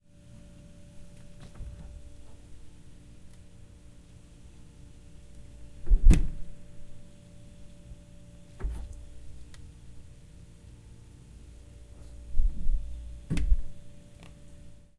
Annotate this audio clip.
Mini-Fridge Open and Close
Koontz, Field-Recording, University, Elaine, Park, Point